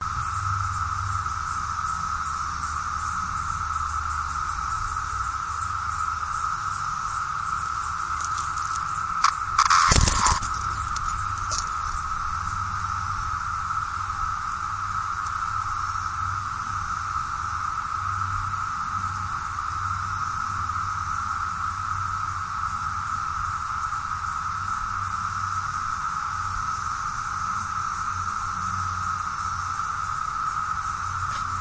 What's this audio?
cicada landing on recorder
landing, summer